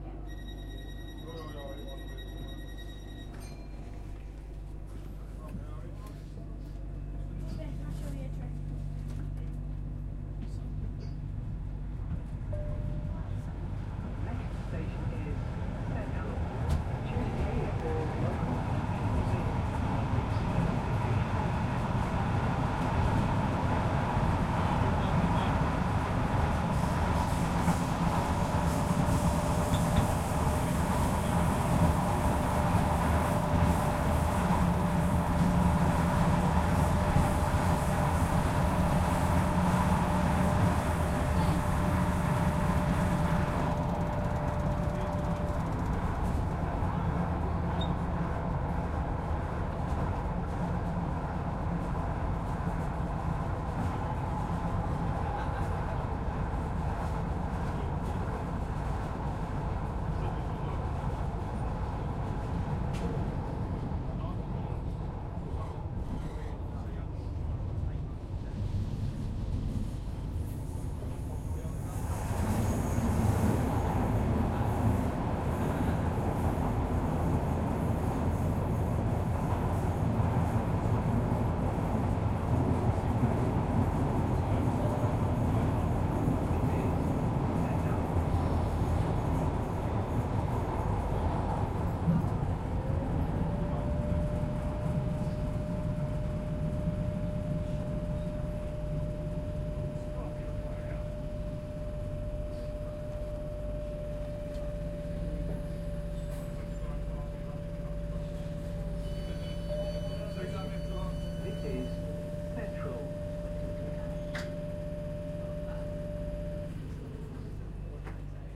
interior train underground metro subway enters station, bridge, cutting, tunnel
moving, above-ground, overground, subway, tunnel, travel, railway-bridge, rail-bridge, travelling, metro, cutting, light-rail, train, tube, station, interior, field-recording, underground